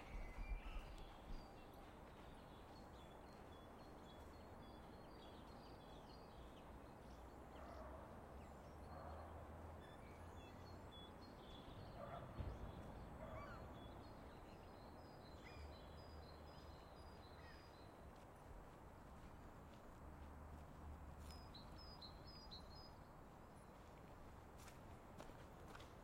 Springbirds 1 mono
Spring bird ambience.
spring-ambience, ambience, park-ambience